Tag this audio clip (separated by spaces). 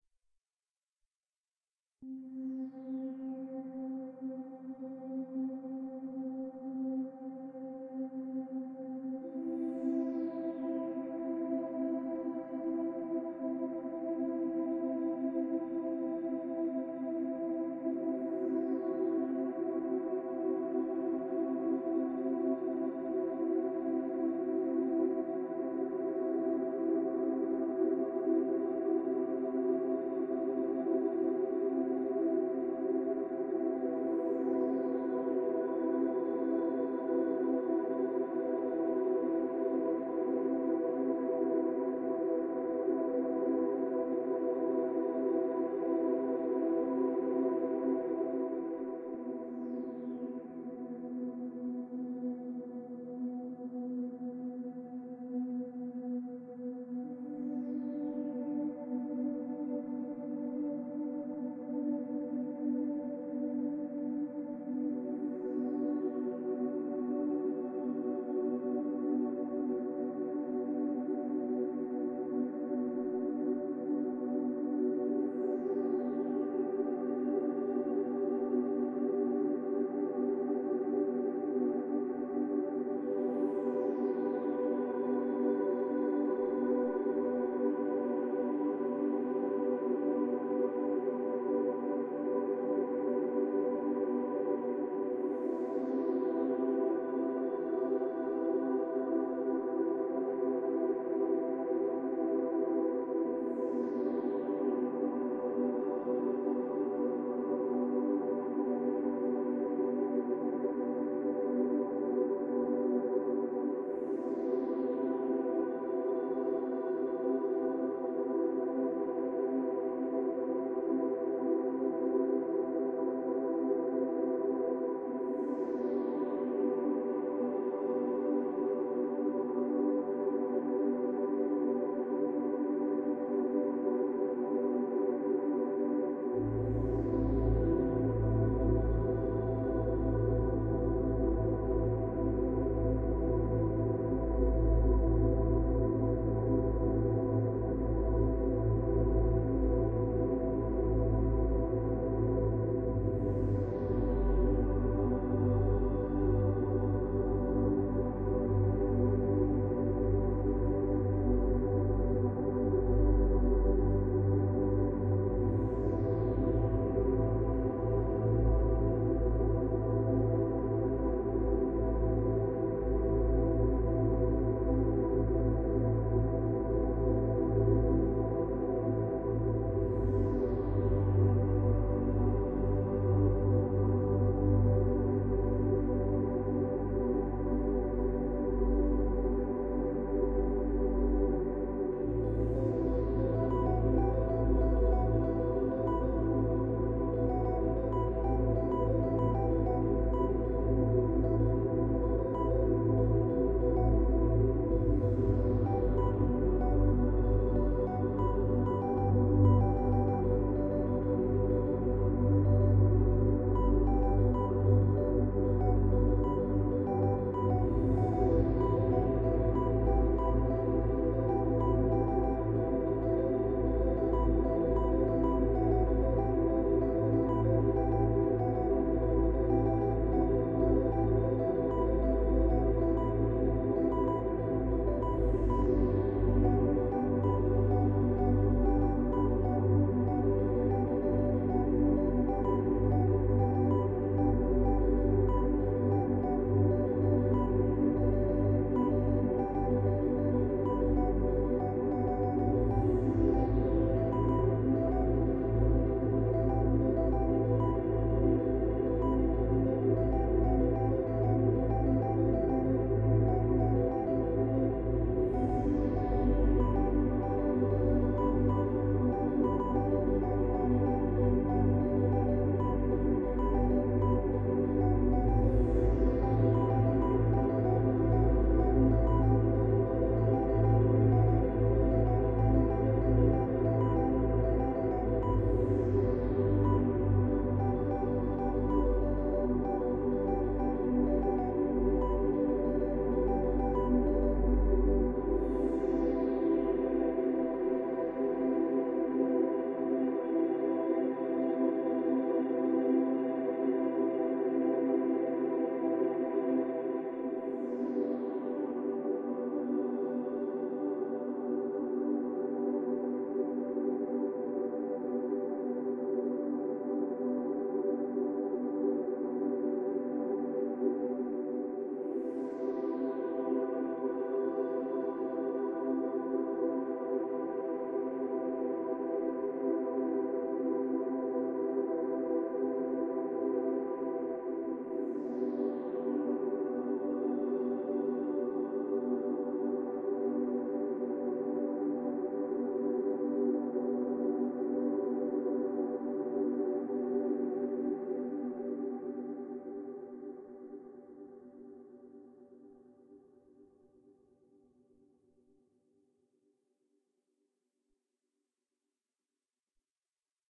music synth relaxation noise sci-fi atmosphere dark